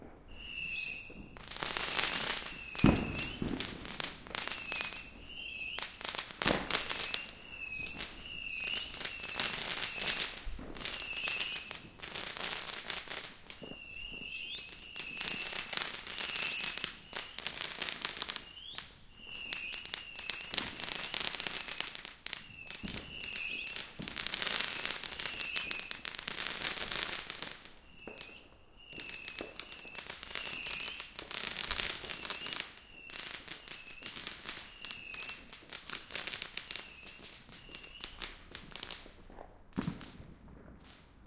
fireworks recording from nov5 in south yorkshire.
fireworks, bangs